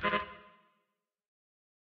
Short Jingle indicating an Error.
Note: G (Fits in G-Major)